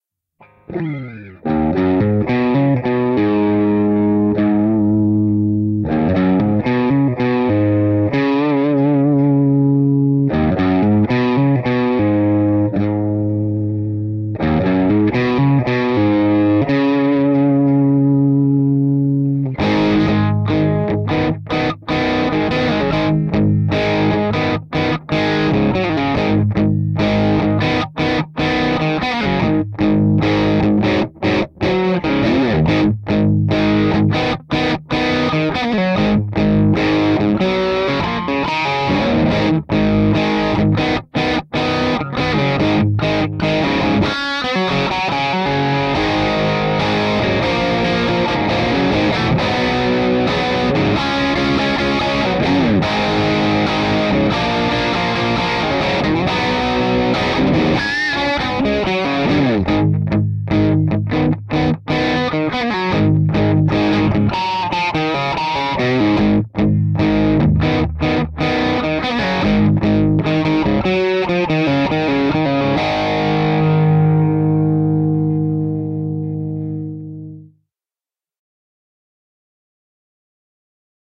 Is it D Sharp G Sharp or F Sharp
Electric guitar low distortion blues style based on D Sharp, G Sharp and F Sharp (you pick)
blues, electric, notes, sharp, guitar